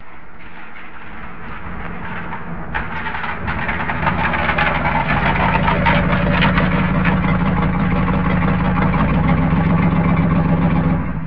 New Truck Pull Up
A truck arrives and stops.